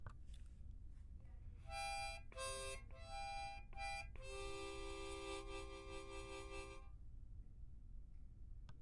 harmonica music beautiful